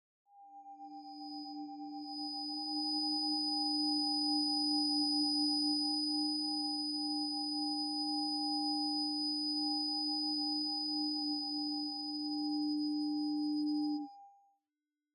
Suspense Motif
Musical motif that could be used to evoke a suspenseful moment.